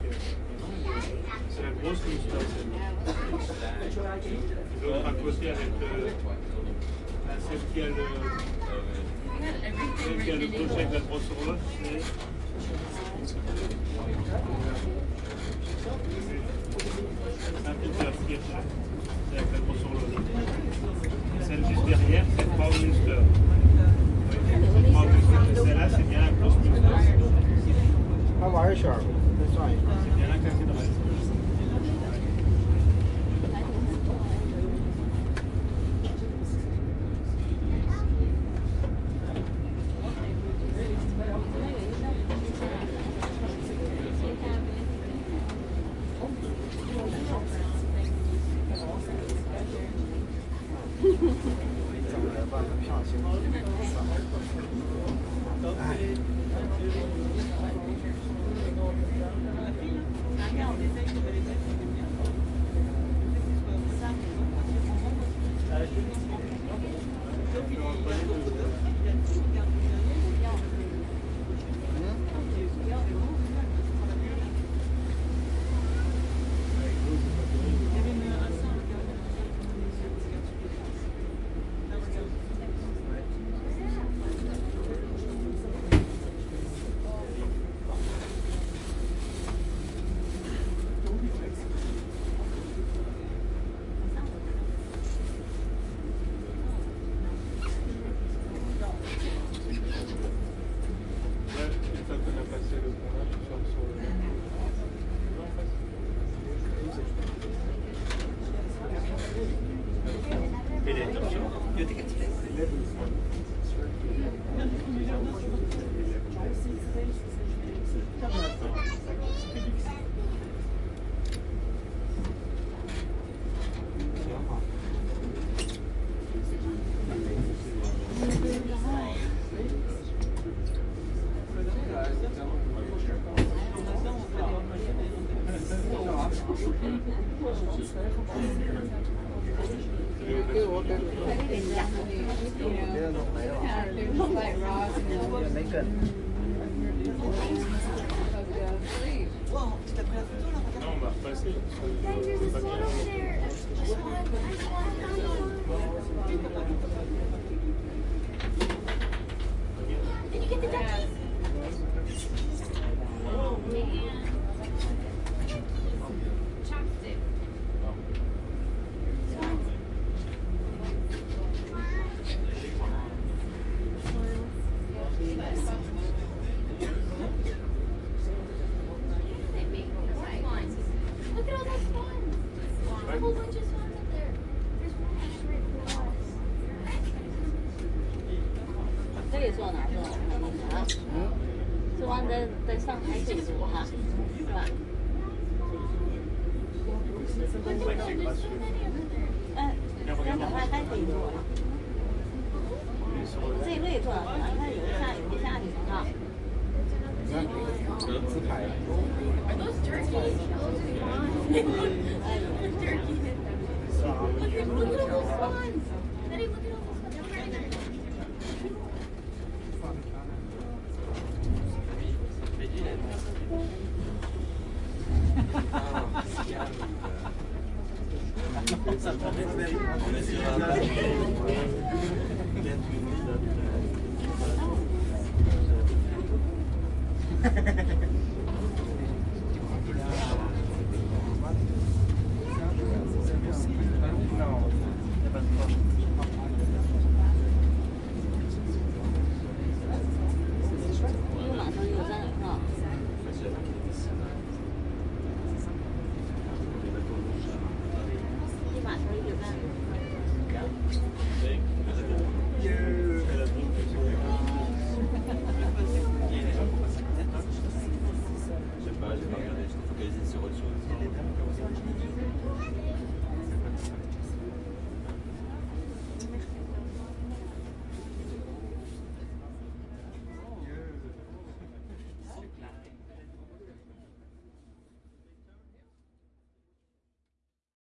regional train ride zurich
inside a modern local train with people speaking multiple languages on the way to zurich airport.
passengers, passenger-wagon, railway, train, travel